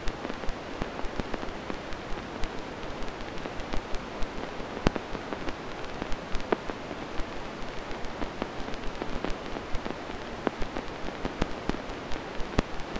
Synthesized record pops.